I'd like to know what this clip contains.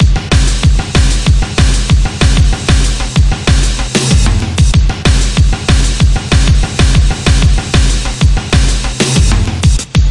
Drum Beat 4 - 95bpm
Groove assembled from various sources and processed using Ableton.
drum-loop, drums, groovy, percussion-loop